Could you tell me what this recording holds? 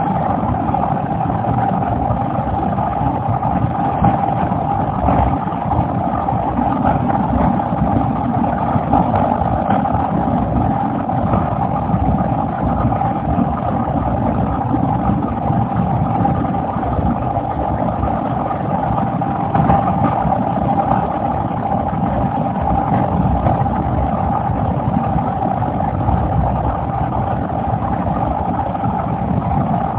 defender air filter white noise
air, defender, filter, noise, white